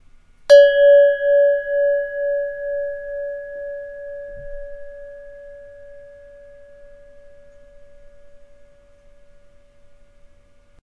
Indian Desert Bell 2
Part of a pack of assorted world percussion sounds, for use in sampling or perhaps sound design punctuations for an animation
hits, world